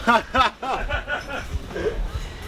laugh loud
Laughter recorded in a French market. Part of a field-recording pack. Made with minidisc.